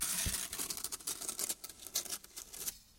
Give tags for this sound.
objects
brush
variable
scrapes
random

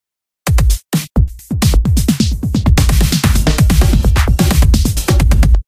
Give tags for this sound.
hop
hip
RB
rap
sound
sample
disko
loop
beat
song
Dj
lied
dance

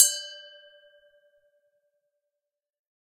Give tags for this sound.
glass
hit
one-shot
percussive
tuned

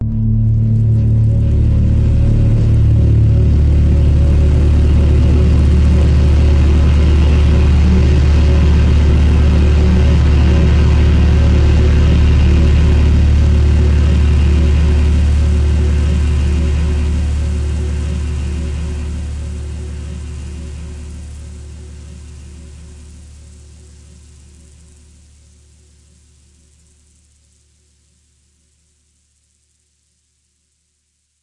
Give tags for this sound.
strings
ambient
granular
rain
synth
multisample
tremolo
pad

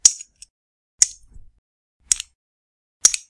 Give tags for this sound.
brick
fall
falling
lego

